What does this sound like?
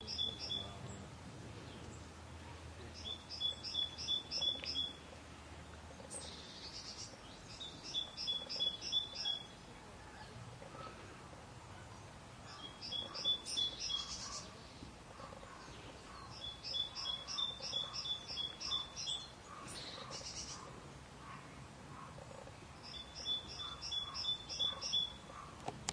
Great Tit song
birds, british